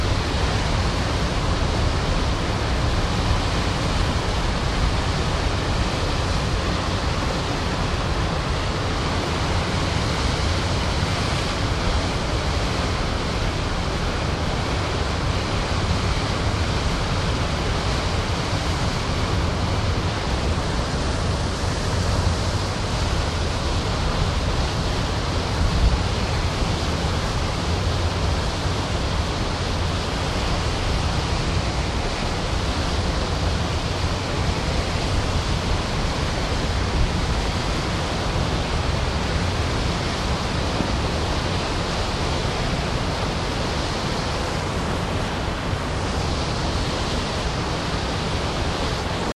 Water lapping the hull in monophonic glory on the Cape May-Lewes Ferry heading south recorded with DS-40 and edited in Wavosaur.